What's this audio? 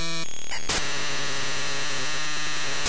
Glitch Computer
Glitch sound I made from importing RAW data to Audacity.
computer, digital